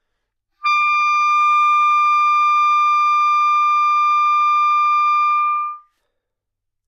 Part of the Good-sounds dataset of monophonic instrumental sounds.
instrument::clarinet
note::D
octave::6
midi note::74
good-sounds-id::3273